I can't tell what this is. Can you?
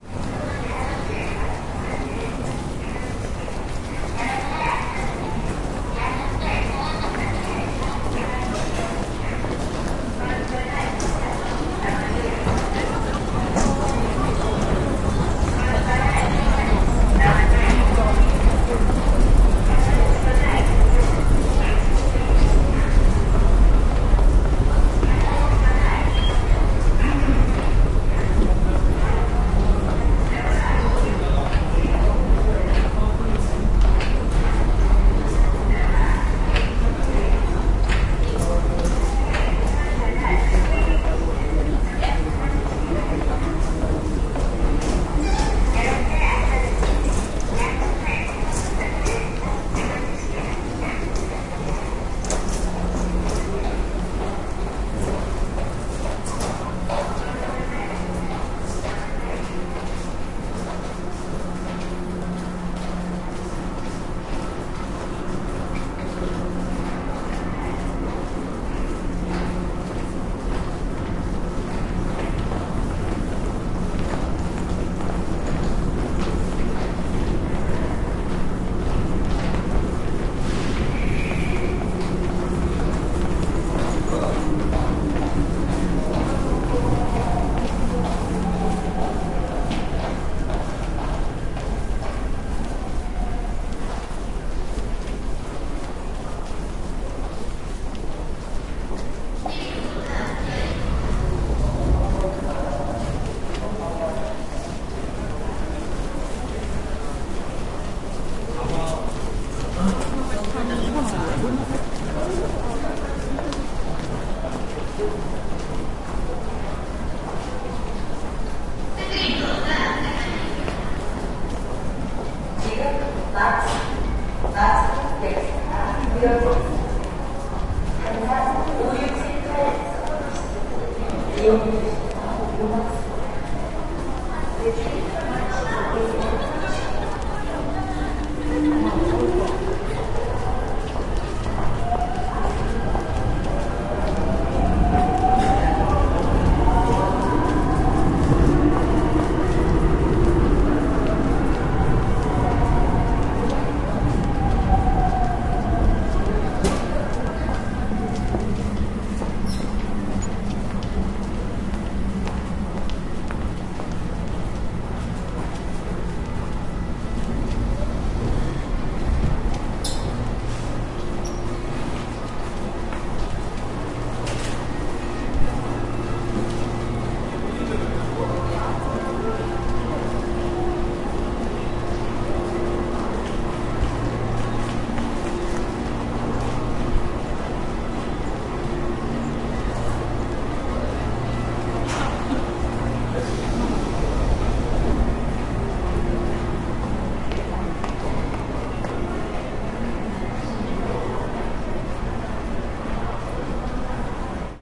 In the metro station people walk. Speaker with information about the metro
20120118